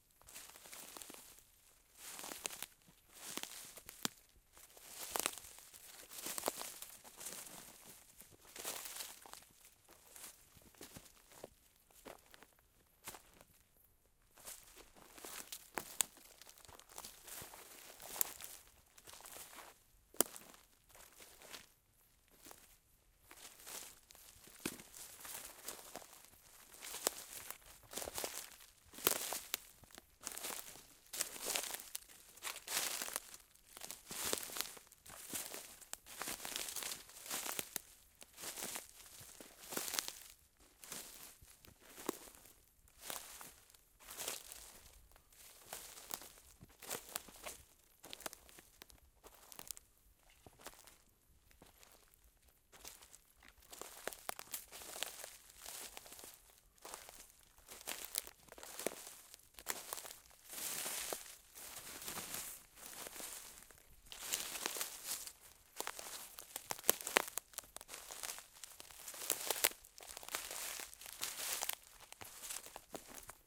kroky v listi footsteps leaves
footstep, footsteps, leaves, walk, walking